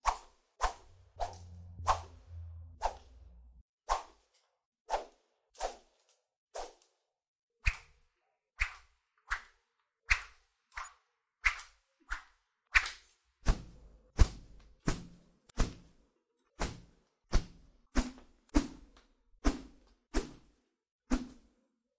Swoosh Swish
Some swooshes/ swishes made of many materials
swing, attack, woosh, whoosh, swooshes, whip, swishes, swish, punch, stick, bamboo, flup, wind, swoosh, swash, wish, wooshes, luft